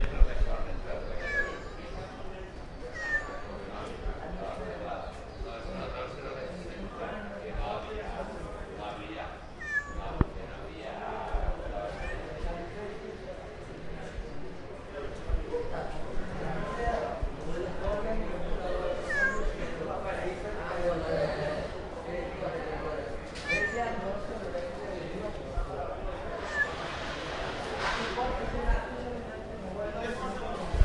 STREET PEOPLE CAT 01
madrid, spanish, ambient, conversation, cat, lavapies, spain, voice, street